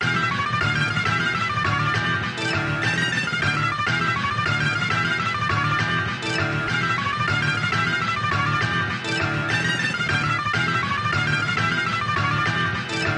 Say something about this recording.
Flamenco Guitar Riff 3
Recorded with a Fairlight IIX using a Shure 57 and a Martin Acoustic guitar. So this is essentially an 8-Bit Fairlight Sample!
Time Signature is 13/4 or 9/8 ~
Guitarist is Ajax
Riff, Guitar, Flamenco